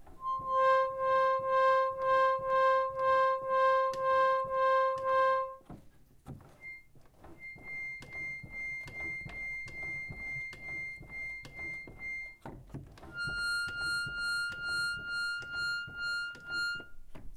Pump Organ - C4, C5, F5
Recorded using a Zoom H4n and a Yamaha pump organ, I played the C notes above middle C, and then the highest note, an F.
c, high, notes, organ, pump, reed